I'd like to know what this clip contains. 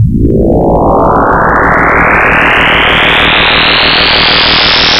left: Sin[700*t + 20*t*Sin[350*t]] / right: Sin[700*t + 40*t*Sin[125*t]] for t=0 to 5
formula, mathematic